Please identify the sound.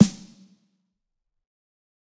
Snare Of God Wet 002
the only fail of this pack was made all sounds scream too much since the beginning of the range :(
drum, drumset, kit, pack, realistic, set, snare